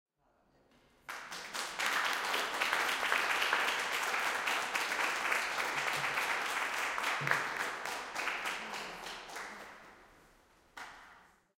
180310 - Rijeka - MMSU PS 2010
Applause after lecture of Seadeta Midžić during International conference. Pierre Schaeffer: mediArt. MMSU, Rijeka.